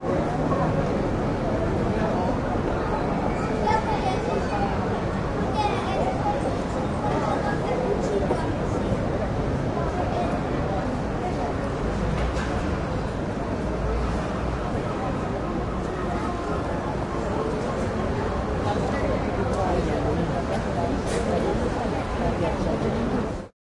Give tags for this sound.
market
field-recording
people
urban
ambiences
crowd
soundscape
social-sound
cities

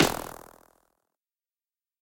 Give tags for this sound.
idm
noise
kit

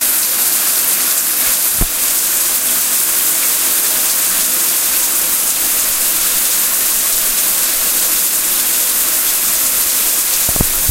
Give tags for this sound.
bath
water
shower
bathroom

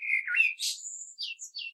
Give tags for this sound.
bird
blackbird
field-recording
nature

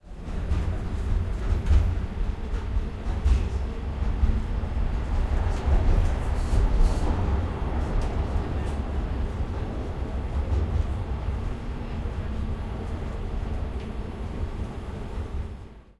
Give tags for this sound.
city
ride
tram

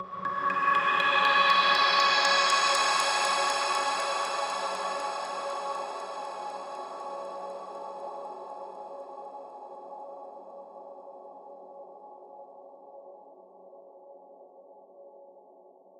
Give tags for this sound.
ambience
atmospheric
chillout
chillwave
distance
electronica
euphoric
far
melodic
pad
polyphonic
soft
spacey
warm

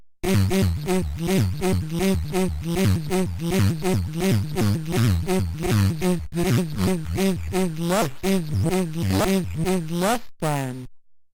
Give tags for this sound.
bent
circuit
glitch
vocal

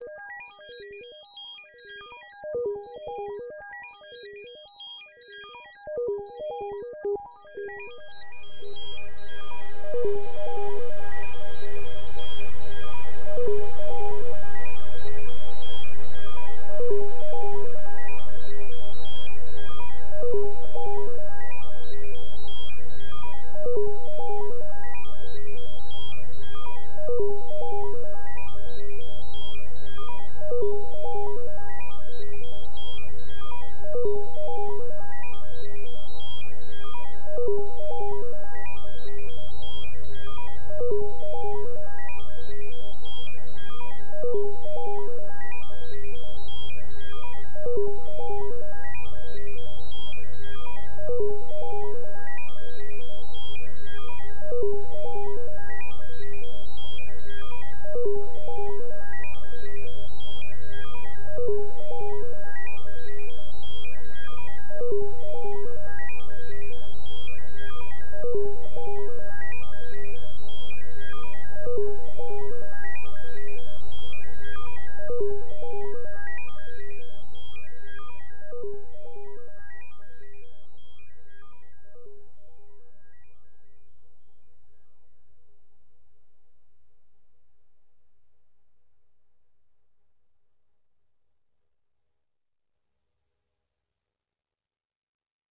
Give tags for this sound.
ambience
atmosphere
Background
choir
cinematic
drone
jupiter
mars
mekur
Melody
planet
processed
reverb
sadness
saturn
sci-fi
sound-design
soundscape
Soundscapes
space
synth
synthesiser
technique
thunder
UranusAtmospheres
venus
voice

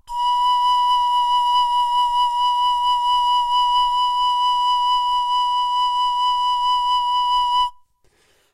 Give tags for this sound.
b2
pan
pipe

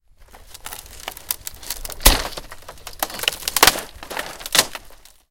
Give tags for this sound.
break
cracking
fence
fences
snapping
wood
wooden

